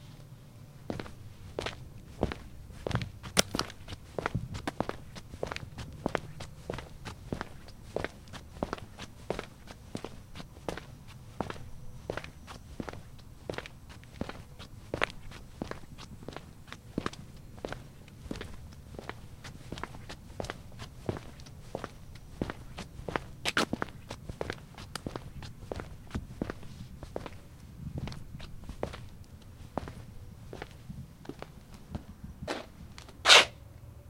Footsteps - concrete - OD - A
Walking on concrete sidewalk - microphone just ahead of the feet - SonyMD (MZ-N707)
footsteps, walking